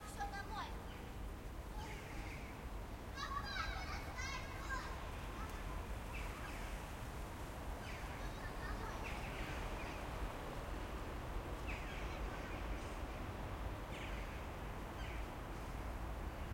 Children playing outdoors